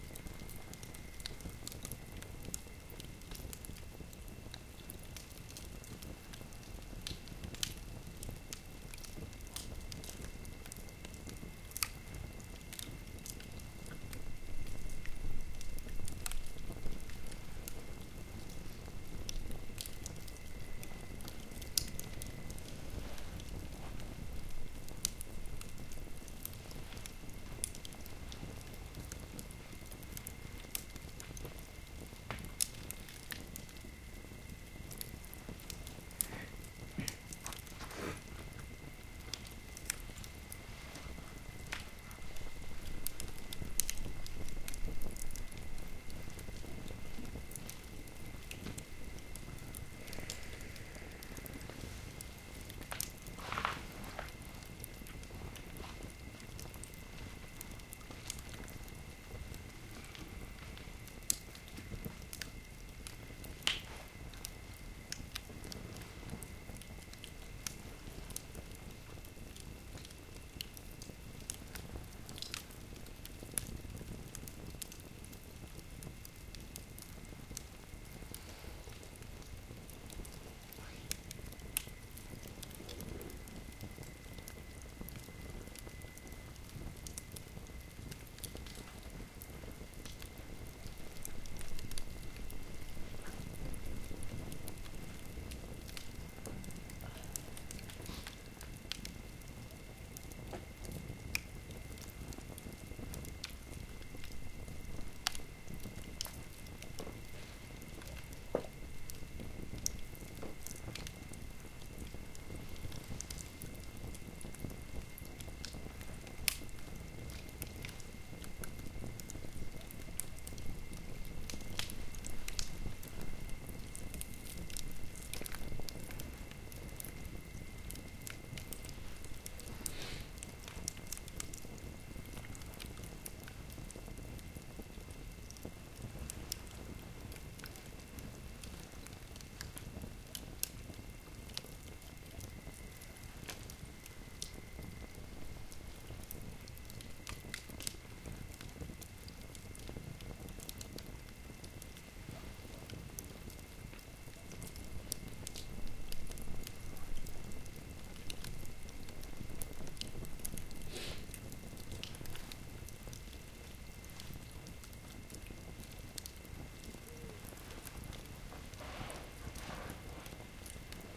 campsite quiet hut night crickets with crackling fire and people sleeping